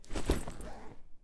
A combination of different sounds, making a sound of a backpack being put on or taken off.
pack, back, backpack, foley